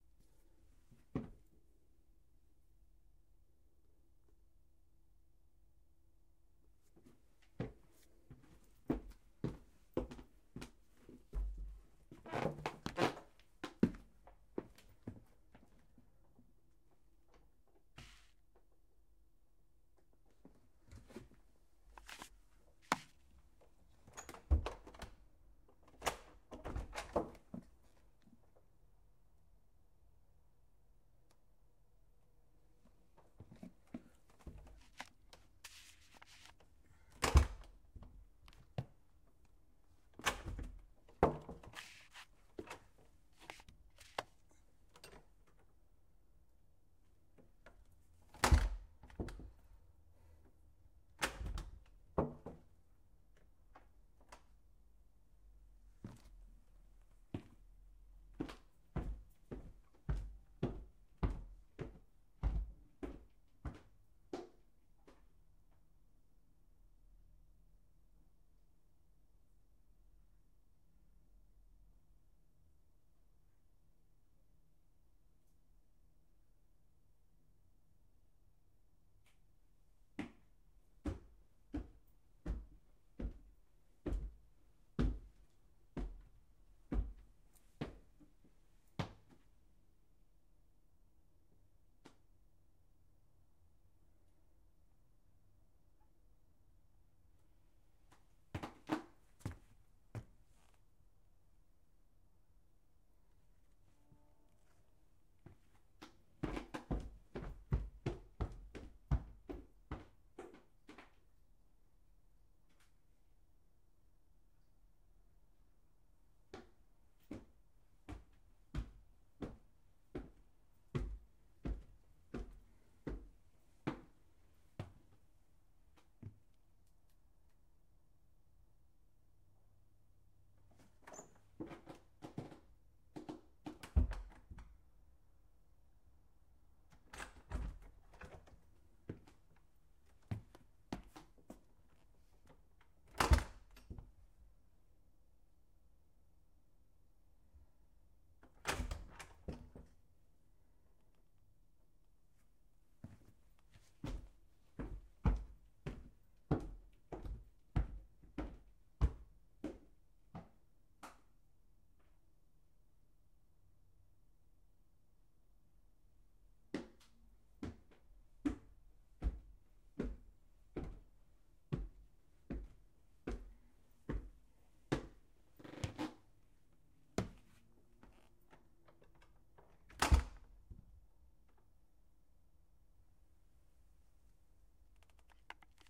WALKING UP-DOWN BASEMENT STAIRS SEQUENCE
Sequence of walking up and down wooden basement stairs in semi-heavy shoes. Tascam DR-05 at top of stairs. Also includes some basement door action. Skip first 1:20.
EFX, Foley, Footsteps, Indoor, Sound, Stairs